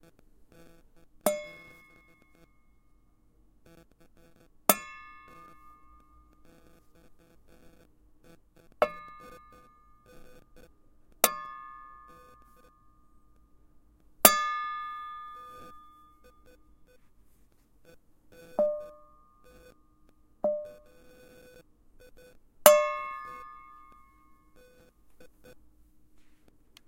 Iron Box Hit
Hitting audio interface metal case.
hit, impact, metal, box